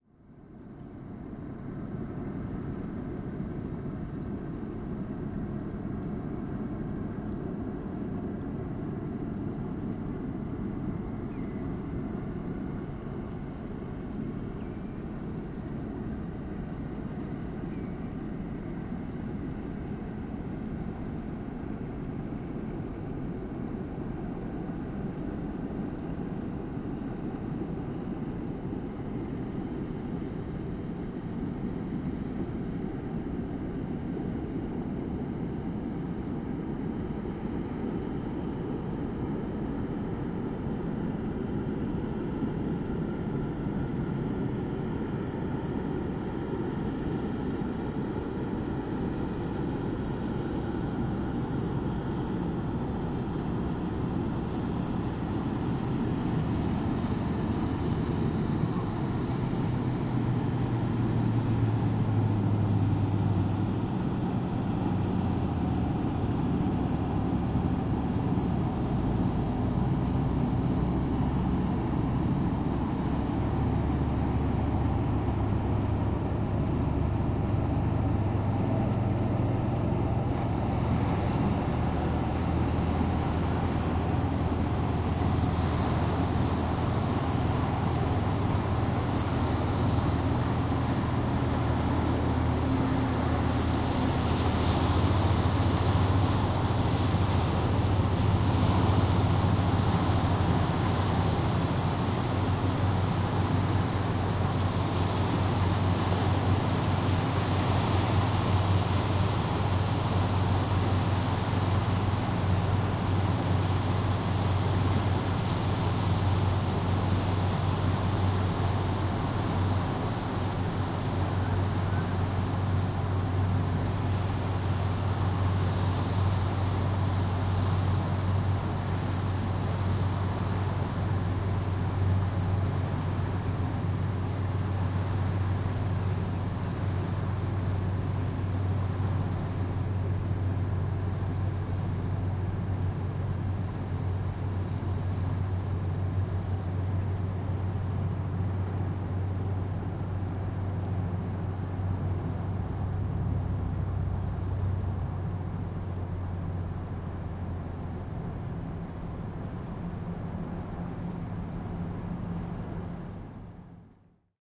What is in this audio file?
Boat motoring past with proceeding waves hitting the embankment
Boat returning to the marina. Microphone positioned on an embankment in Hamford Water Nature Reserve, Essex, Uk. Recorded with a Zoom H6 MSH-6 stereo mic in winter (January)
accelerating, ambience, backwaters, Boat, boat-engine, decelerating, engine, England, essex, estuary, field-recording, h6, hamford-water, Hamfordwater, Hamford-water-nature-reserve, motor-boat, msh-6, msh6, outside-ambient, stereo, uk, waves, zoom-h6